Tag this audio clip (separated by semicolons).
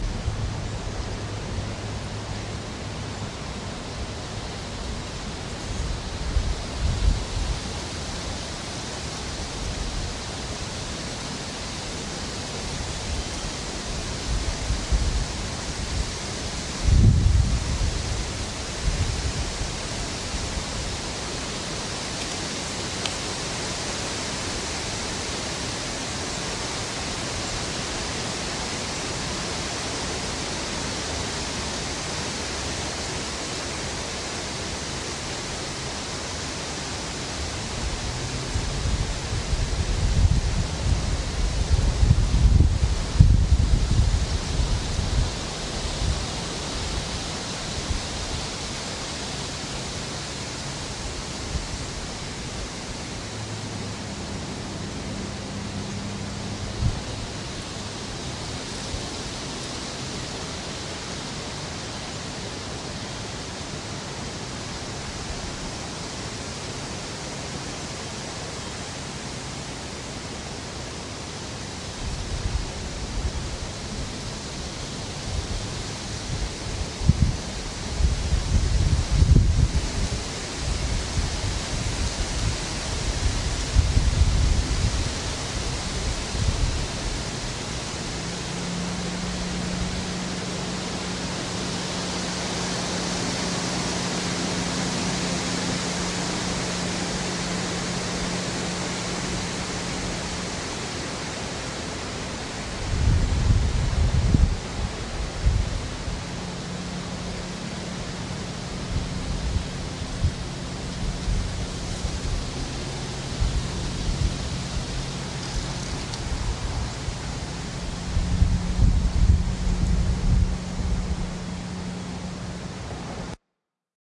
Forest; Leaves; Park; Trees; Windy